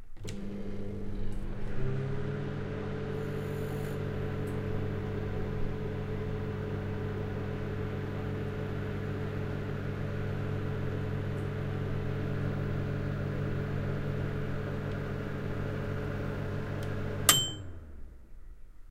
microwave.oven
sound of a microwave oven and final ring. RodeNT4>Felmicbooster>iRiver-H120(Rockbox)/ sonido de un microndas, con el ring del final
household, kitchen, oven, ring